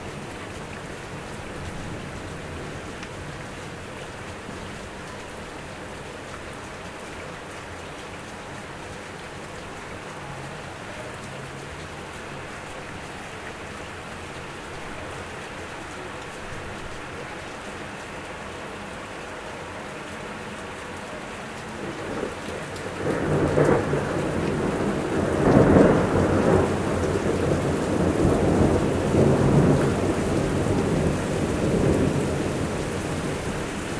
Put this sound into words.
Rain A Little Thunder From Window
Recorded with a Zoom H4n onboard microphones, spur of the moment kind of thing. No checking for an optimal recording position, or levels. I just switched it on, opened the window fully and started recording. The batteries were running on empty so I quickly caught as much as I could.
From, Little